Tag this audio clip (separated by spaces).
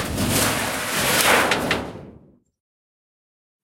rumble rod nails iron percussion hammer blacksmith metal shield industrial hit ting metallic shiny industry lock steel impact factory bell scrape pipe clang